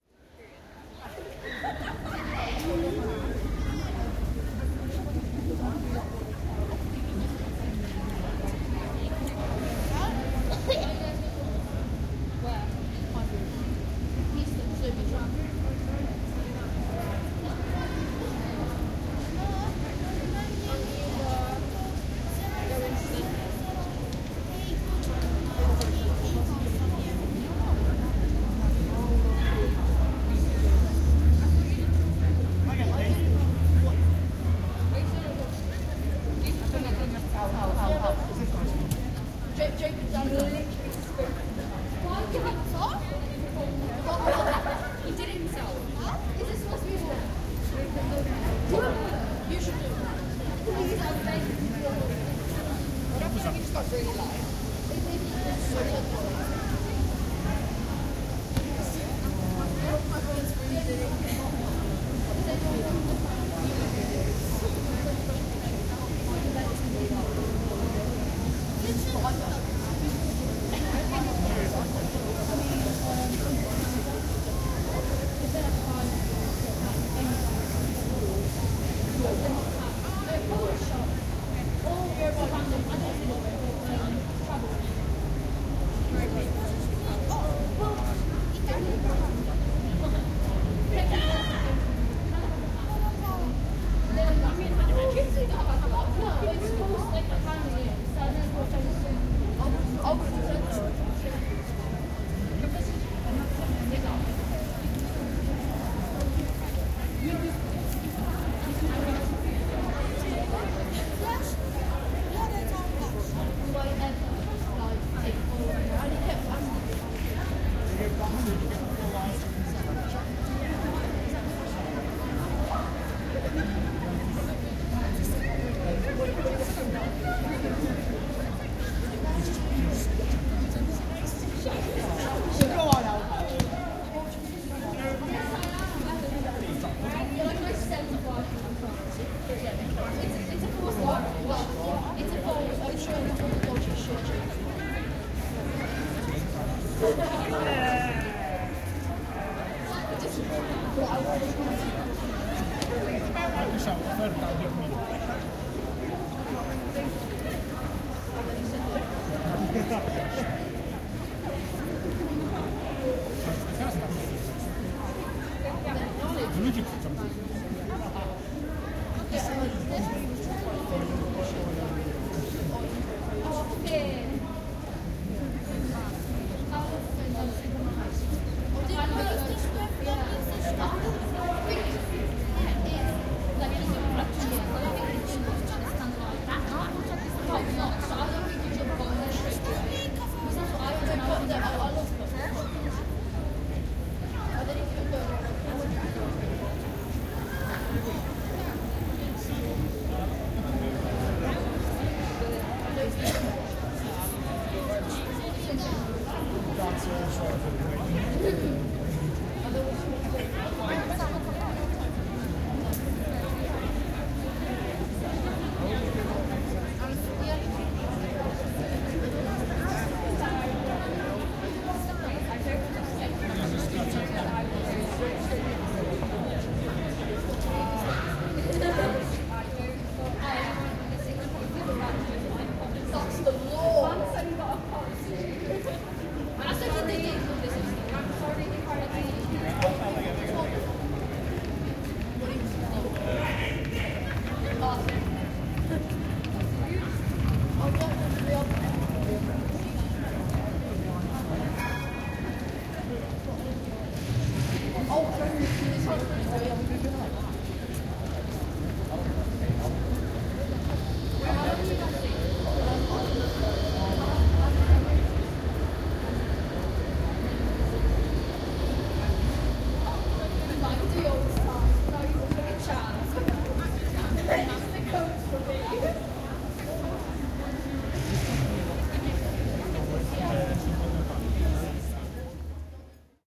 Council House Steps
Weekday evening on the steps of Nottingham Council House looking out onto Old Market Square, late November. Plenty of people still around, distant traffic and tram noises too. Recorded using Voice Recorder Pro on a Samsung Galaxy S8 smartphone and edited in Adobe Audition.
people, ambience, urban, city, town, central, traffic, street, tram